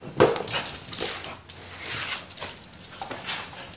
A small impact noise (a deflated ball), the dog makes a short run, claws skittering on the concrete floor, panting and makes a sliding noise.
claws, running, impact, slide, dog, pant, paws, skitter